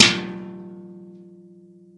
recordings from my garage.
industrial, machine, metal, tools